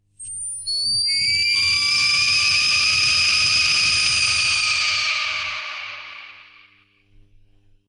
An artificial scream, produced via a feedback loop and an delayed octaver effect on an guitar amplifier.
amp: Laney MXD 30
cry, scream, synthetic